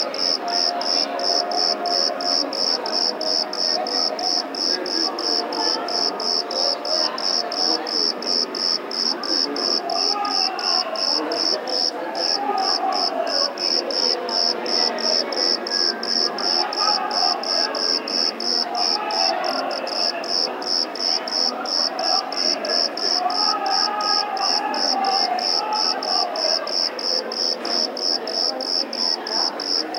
20070724.cricket.city.01
strong cricket call with strong city noise in background, mono recording.
city, crickets, nature, summer